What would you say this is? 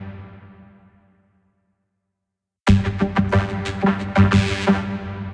Effect Drum
effects, drum, cool